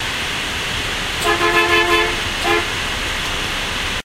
Field recording of a bus honking.